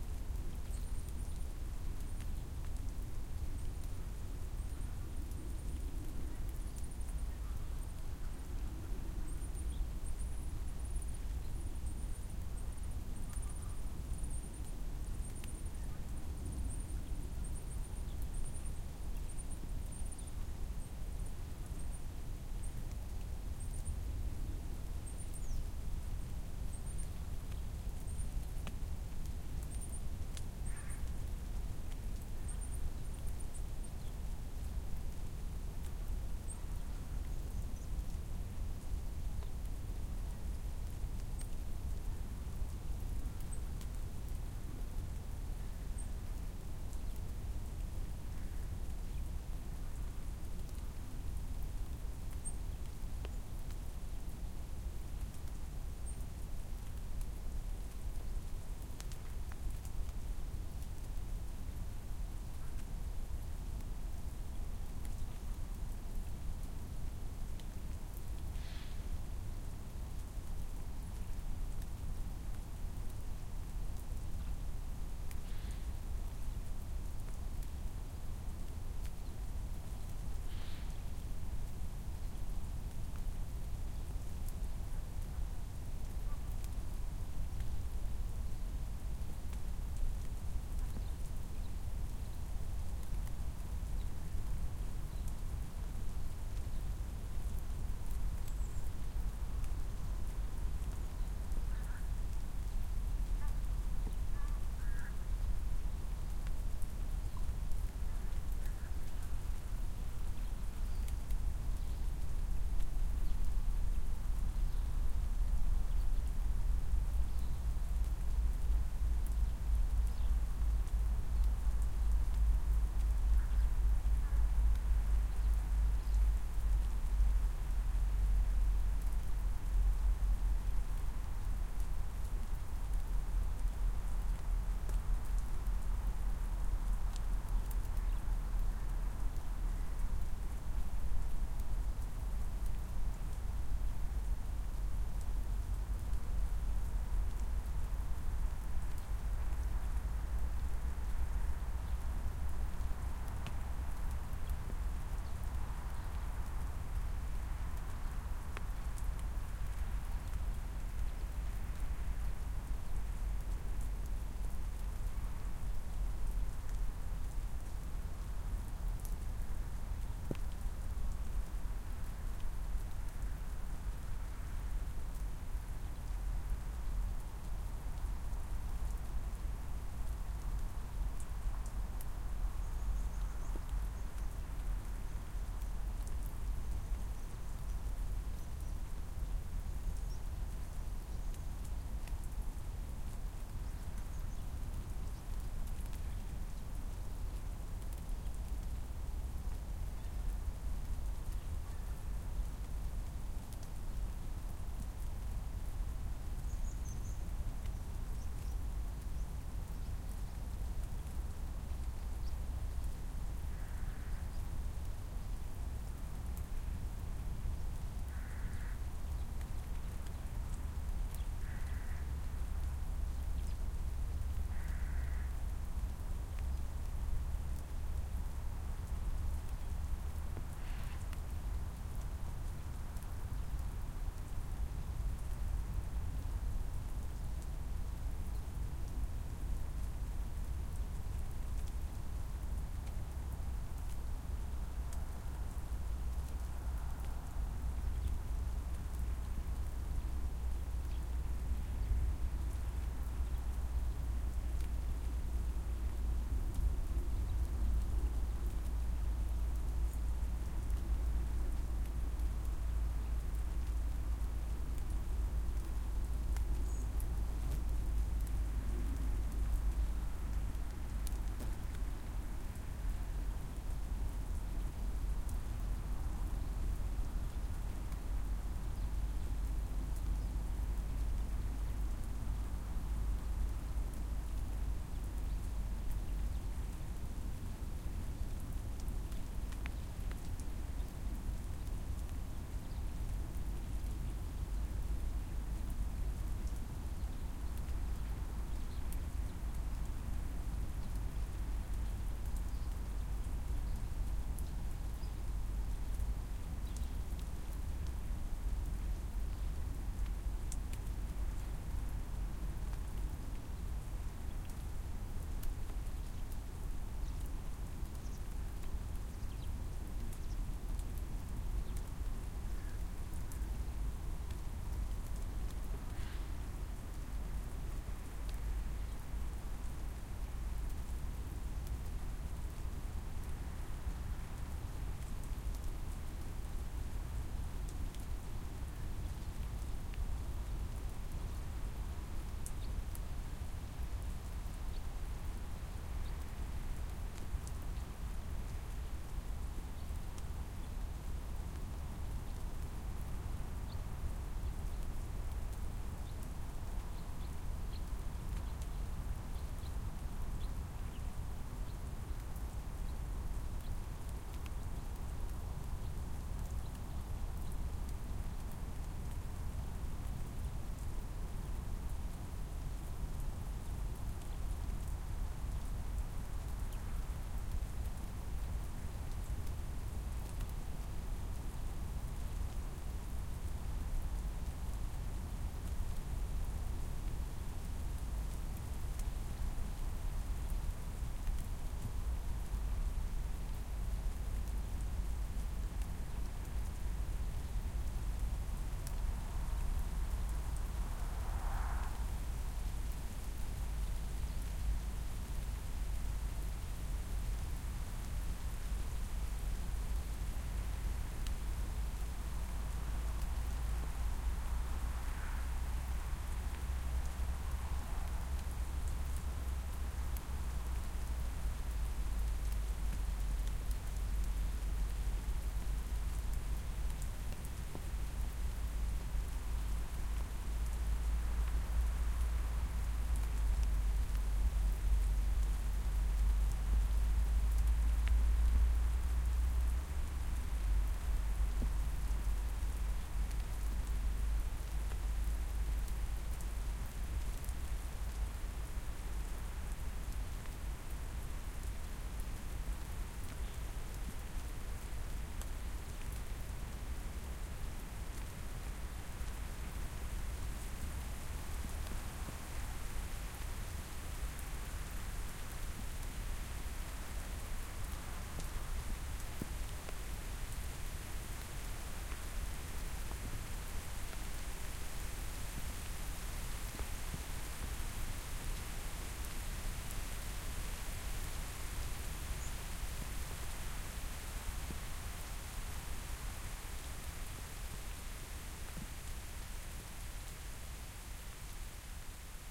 I decided to give it another go, this month. The previous recording was not at all alive in any sense. So this time around i thought i'd make it without any rain. Well i managed to set up the microphones, turn on the recorder, and then came the rain, once again.
At least you can hear something other than rain this time around.
Recorded with a Sony HI-MD walkman MZ-NH1 minidisc recorder and two Shure WL183 mics.